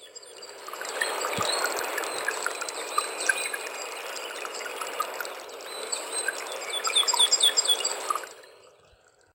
An remix natural sound.
We can see a similarity with sound of jungle.
For create this i used Audacity, i inserted the original sound of water.
First, I removed silence time. After I amplified the sounds of birds with effect and I reduced the sound of water.
With this modifications I improved original sound for have the best sound possible.
I wanted to highlight the sounds of birds to bring out the sounds of water.
After doing that i normalize the sound and i save.
Duration : 0,09mn